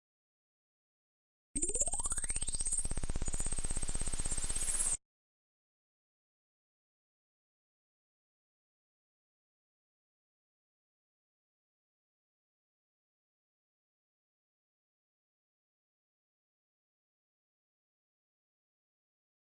bottle o pop